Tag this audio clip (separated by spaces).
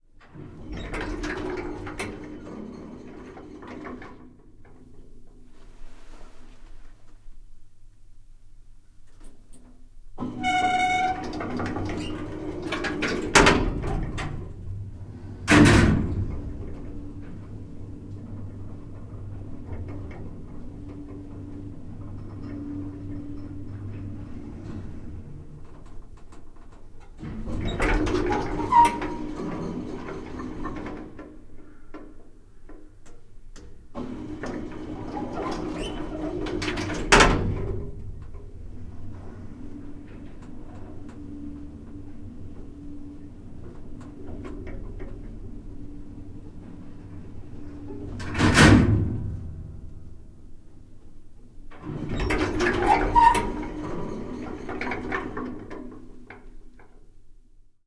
open elevator close opening machine lift inside